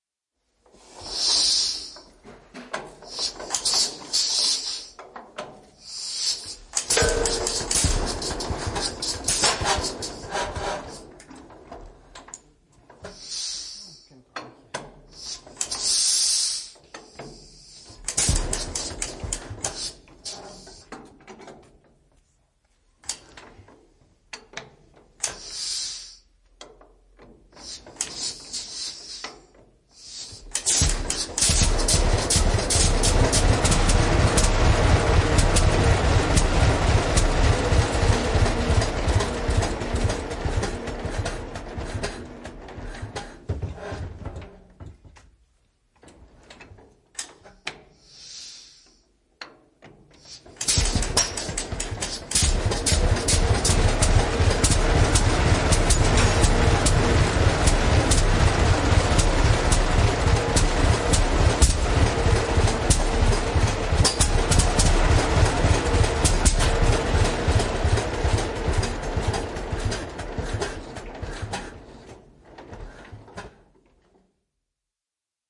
Tractor Waterloo Boy R 1917
Sounds from the engine of a Waterloo Boy R,
recorded on February, 19th 2015,
at Traktormuseum in Uhldingen at Lake Constance / Germany
Waterloo Boy R facts:
Year: 1917
Engine: 2 Cylinder, 6486ccm, 25 Horsepower
Weight: 2814kg
agriculture, engine, field-recording, museum, tractor, traktormuseum, waterloo, waterlooboy